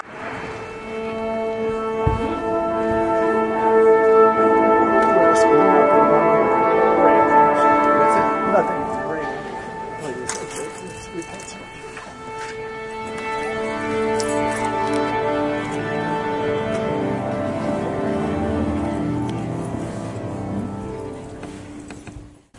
Orchestra Tune-Up 1
Recorded with a black Sony IC voice recorder.
tune-up, orchestral, music, symphony